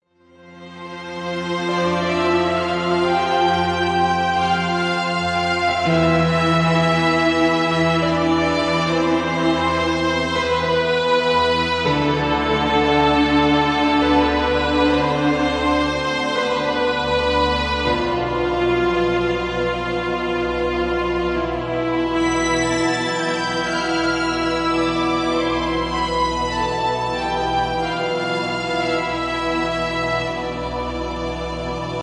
Full Cine
Made in Logic Pro 10 this music is made with a nice and peaceful melody.
Cinematic, Cinema